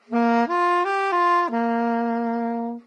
Non-sense sax played like a toy. Recorded mono with dynamic mic over the right hand.
loop, melody, sax, saxophone, soprano, soprano-sax, soprano-saxophone